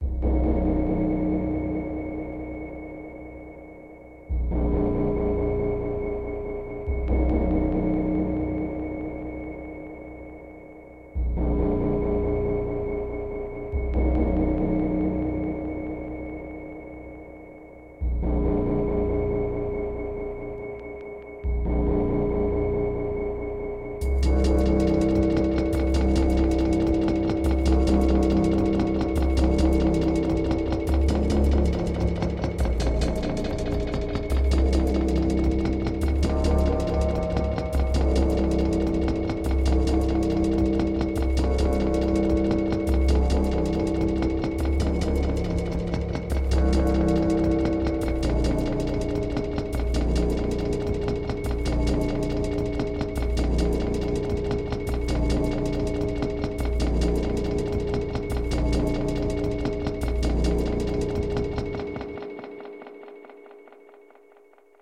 Slow Mellow Suspense (They're Going to Get You!)
This is the send (bus) track from a song I wrote. It would be useful in spooky moments. When the drums kick in, you can imagine that a person's fears (which they had been pondering) are suddenly coming true and you are being chased, or running away, trying to get away from something unpleasant. good luck !